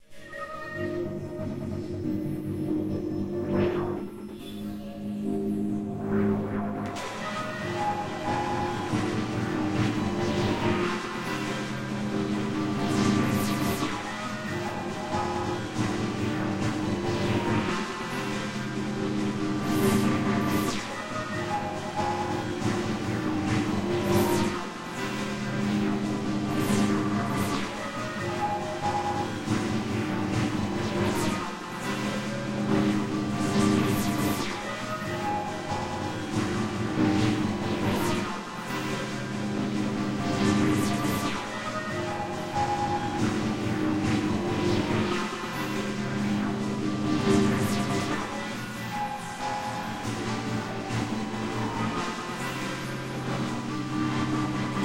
Sunny Cities and who they remind me off that I have been too in the past 5 years. Ambient Backgrounds and Processed to a T.
copy, rework, pads, backgrounds, heavily, distorted, processed, valves, glitch, tmosphere, atmospheres, saturated, paste, clip, cuts, ambient, soundscapes